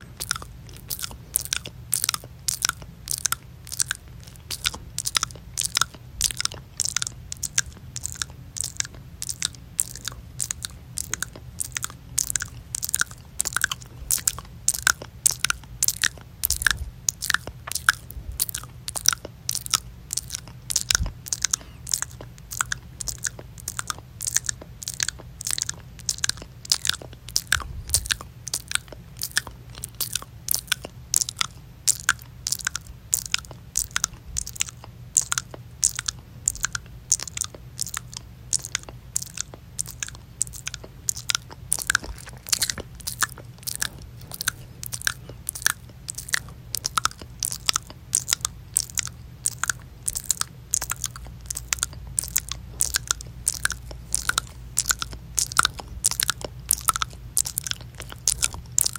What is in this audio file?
ASMR Mouth Sounds 1
Relaxing ASMR mouth sounds
sounds, relax, saliva, mouth